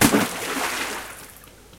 Large Splash
a larger water splash, recorded on a Zoom H4n
splash, splashing, water